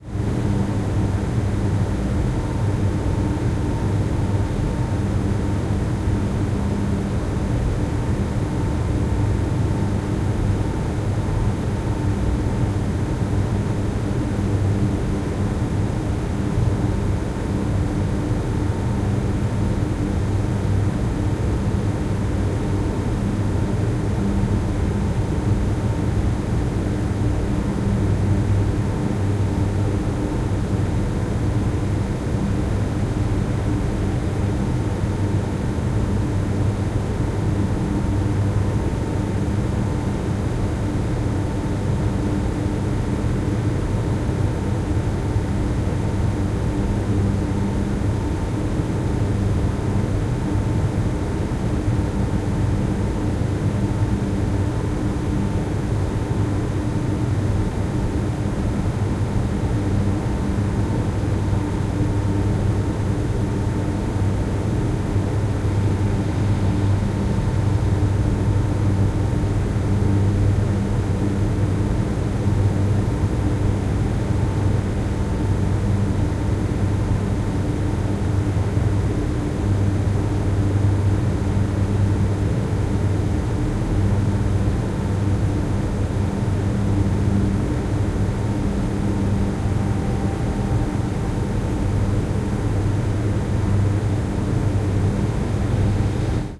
Front recording of surround room tone recording.